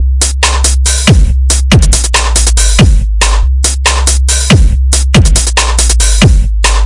Loop A01b - Drums, Sub
A drum loop at 70 bpm with kick, snare, three hats, and a sub. Kick and snare made in FL Studio 11; loop sequenced in FL Studio 11. Sub made in sub freak.
140, snare